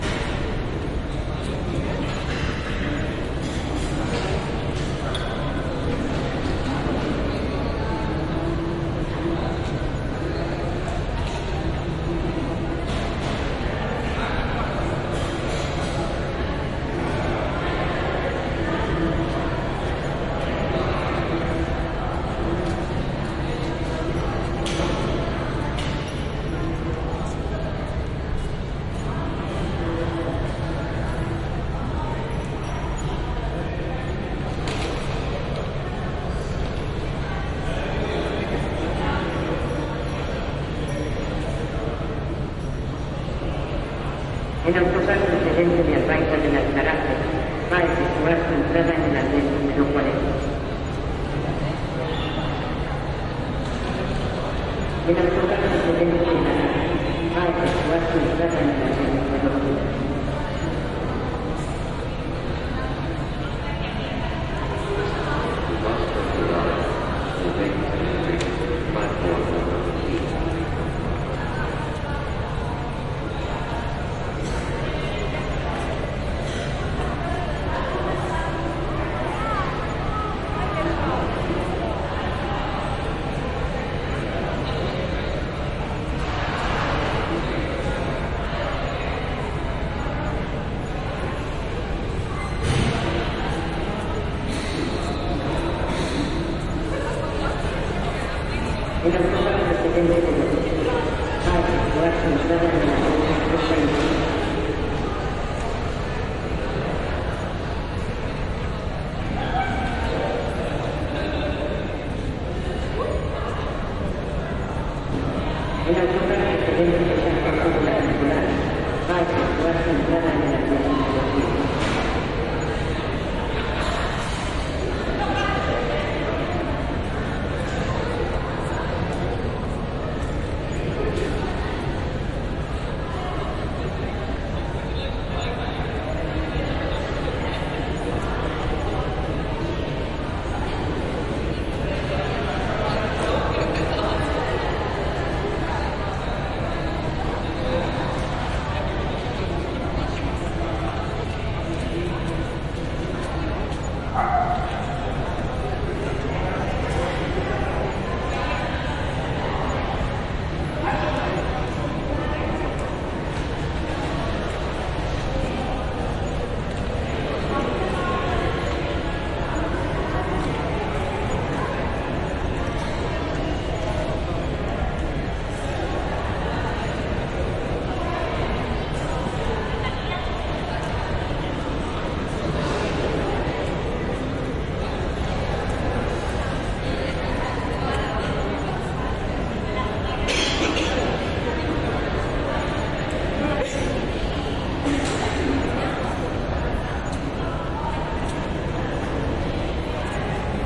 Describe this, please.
tokui seville bus station
Recorded in 2018/07/02. Plaza de Armas, Bus Station, Seville, Spain.